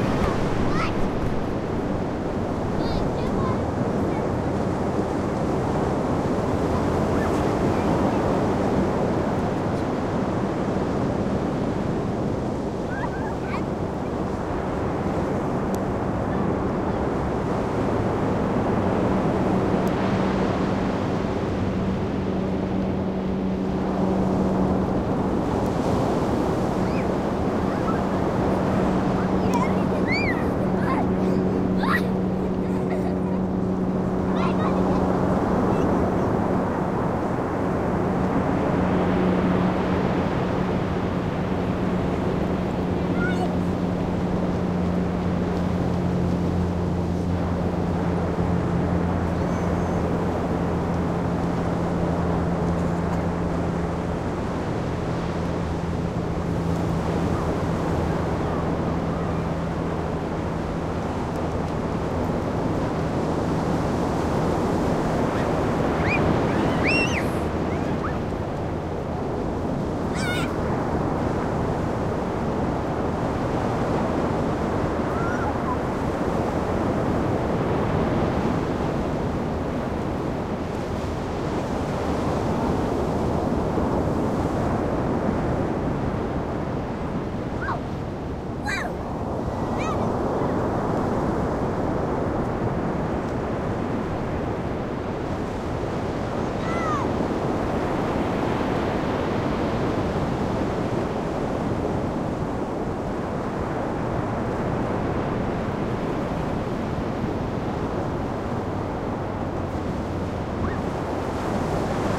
pacifica-linda-mar-ocean2
the waves at linda mar, pacifica, california. kids playing in the waves.
kids, linda-mar, ocean, pacific